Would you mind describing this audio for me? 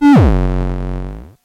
ET-1PitchMadness04
High to low note hit. Might make for a good bass? Recorded from a circuit bent Casio PT-1 (called ET-1).
bent, circuit, lofi, pitch, bass